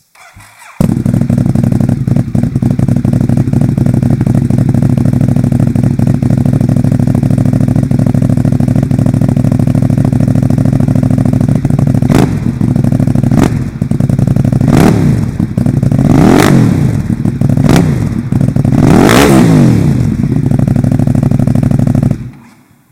Ducati Monster 821 exhaust sound
Bike exhaust sample of Ducati's monster821 bike, Recorded using a Zoom R 16 and a Blue Encore 100 dynamic mic.